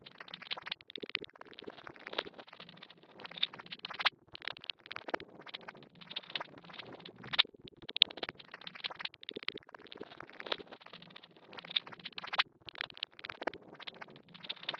One in a small series of odd sounds created with some glitch effects and delays and filters. Once upon a time these were the sounds of a Rhodes but sadly those tones didn't make it. Some have some rhythmic elements and all should loop seamlessly.